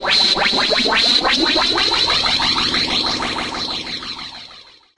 A short electronic sound good for an error sound, startup noise, or alert. Also may be good for podcasts.